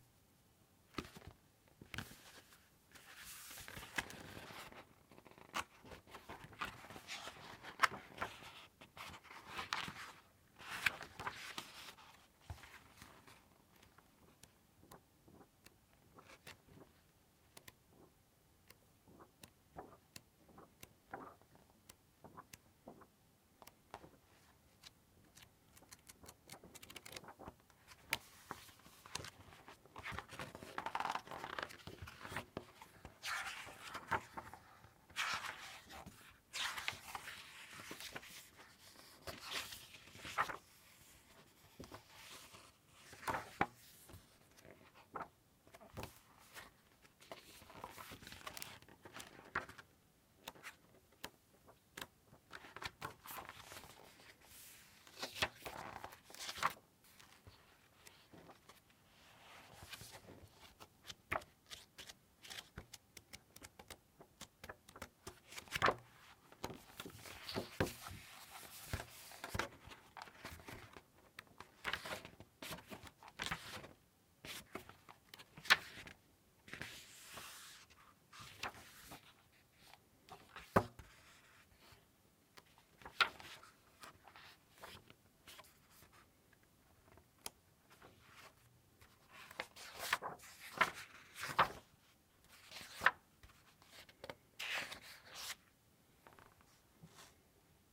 Lots of variations on glossy magazine sounds; page turns, handling, page flips, etc. Recorded on a Sound Devices 744T with a Sanken CS-1 shotgun mic.
flip, Glossy-Magazine, magazine, Magazine-pages, page-turns, reading, turning-pages